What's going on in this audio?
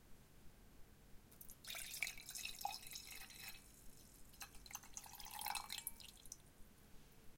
Drink pour
Liquid being poured in to a glass slowly, as it runs out fairly quickly!